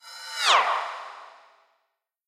cymb shwish 08
cymbal hit processed with doppler plugin